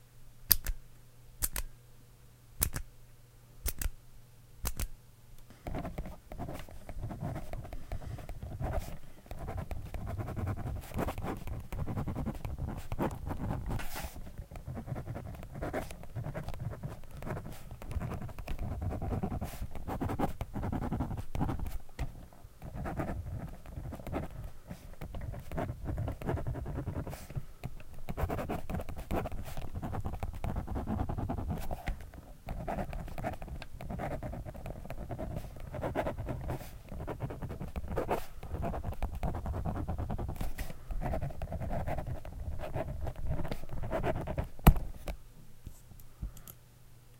Pen click and writing
Pen clicked and writing.
click, write, pencil, paper, draw, scribble, writing, pen